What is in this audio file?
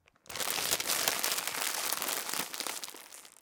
Closing a Bag of Chips
Chip, Bag, Closing